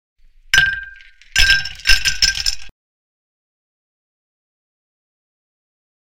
Ice In A Glass
Ice dropped in a tall, glass. Shotgun mic placed at a closed distance and aimed around the bottom of the glass.
foley, ice